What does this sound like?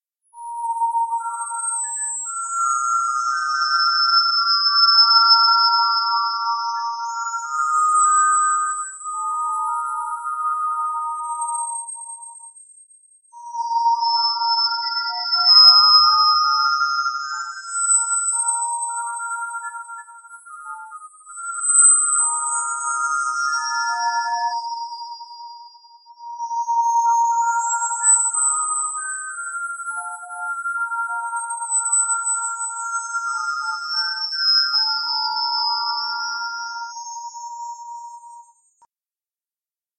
HF Rmx danielc0307 creepy swedmusic
A cleaned up version with reverb and other fun effects. (original by danielc0307)